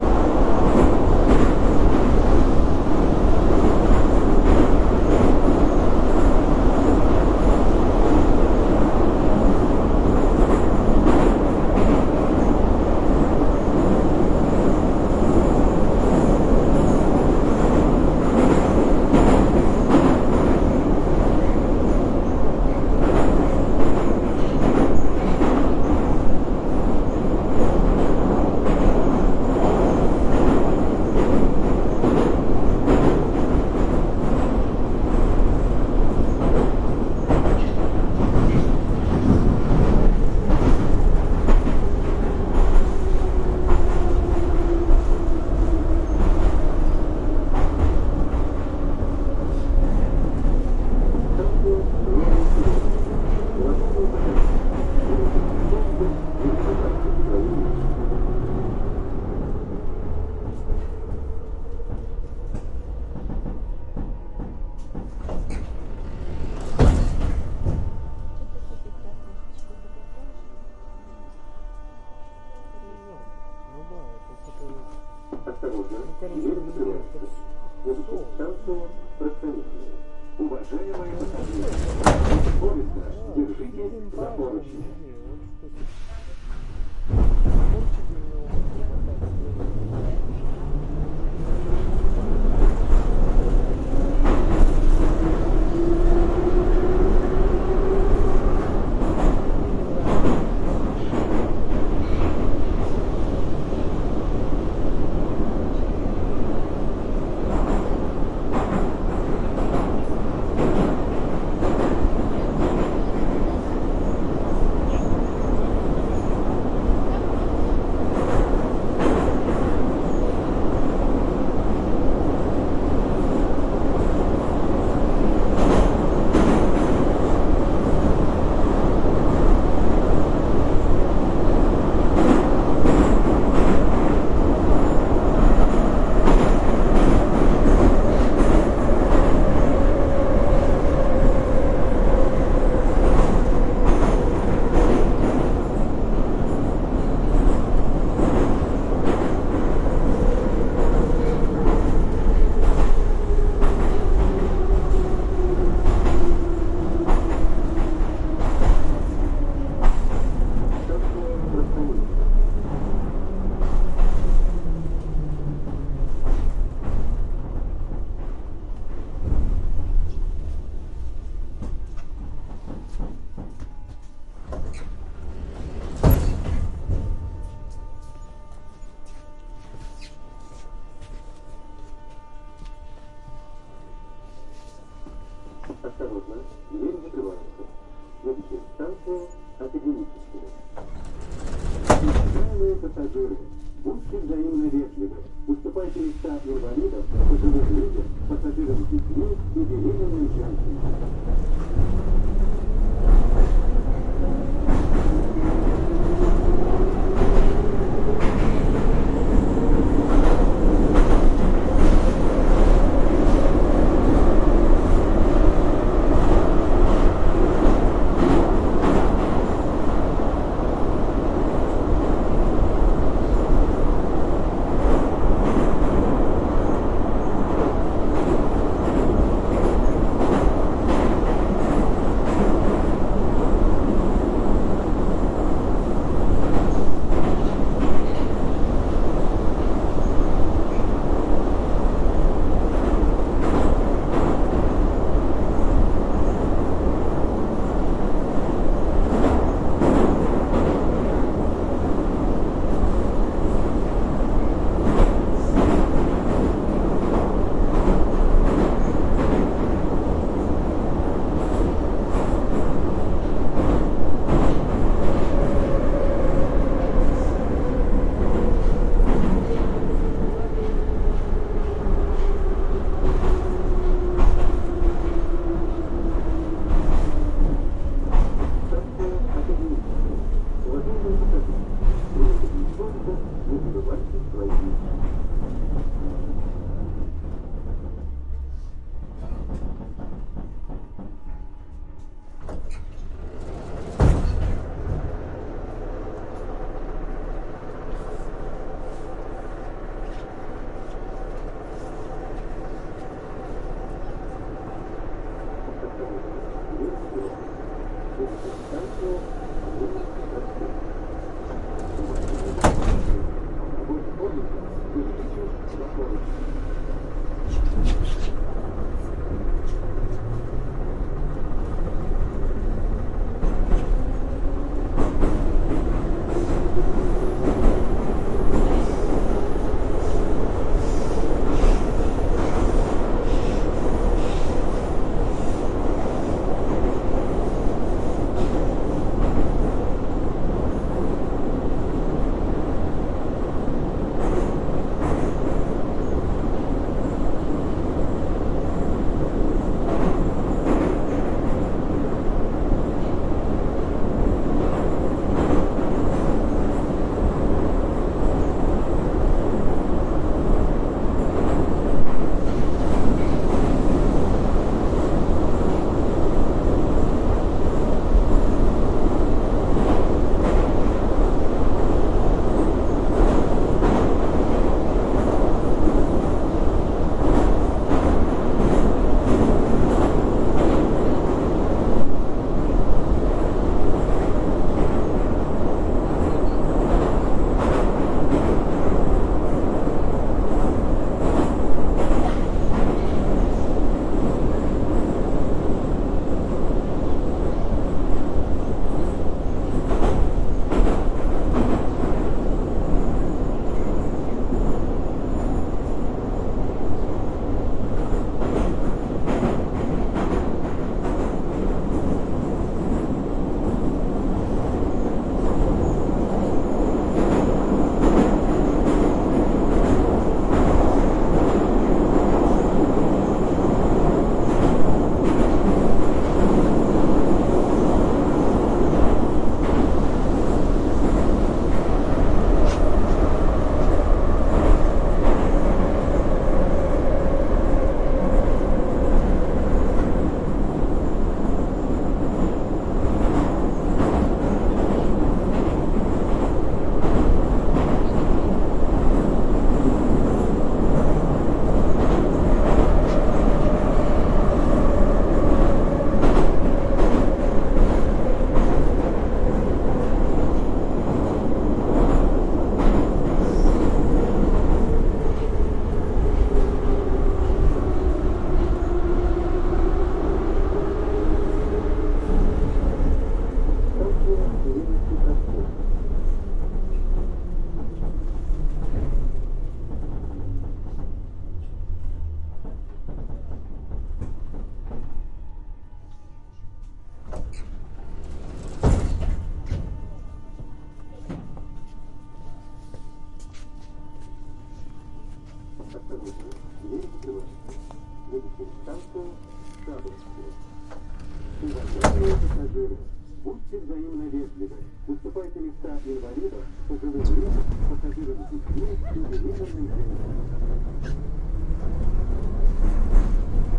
Moscow subway ride - Orange line - Novye Cheremushki to Leninskiy Prospekt OMNI mics
Moscow subway ride - Orange line - Novye Cheremushki to Leninskiy Prospekt
train interior, with stops, announcements, people talking
Roland R-26 OMNI mics
announcement, Russian, ride, train, field-recording, orange-line, doors, metro, station, people, subway, wagon, Moscow, Russia, underground